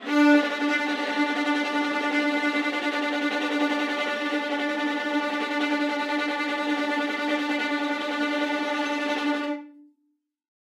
One-shot from Versilian Studios Chamber Orchestra 2: Community Edition sampling project.
Instrument family: Strings
Instrument: Viola Section
Articulation: tremolo
Note: D4
Midi note: 62
Midi velocity (center): 95
Microphone: 2x Rode NT1-A spaced pair, sE2200aII close
Performer: Brendan Klippel, Jenny Frantz, Dan Lay, Gerson Martinez
d4, midi-note-62, midi-velocity-95, multisample, single-note, strings, tremolo, viola, viola-section, vsco-2